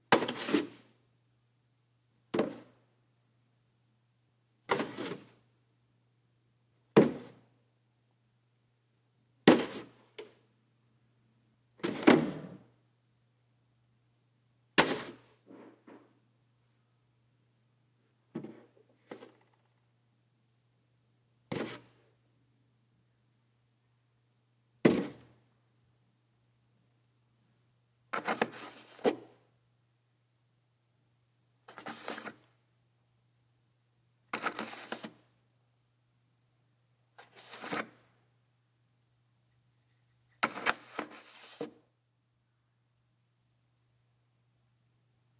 Milk jug, pick up, put down, screw cap, unscrew cap
Picking up and putting down a gallon of milk, then screwing and unscrewing the top
down, top